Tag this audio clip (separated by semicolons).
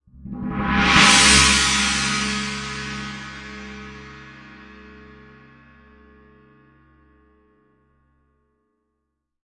beat; bell; bowed; china; crash; cymbal; cymbals; drum; drums; groove; hit; meinl; metal; one-shot; paiste; percussion; ride; sabian; sample; sound; special; splash; zildjian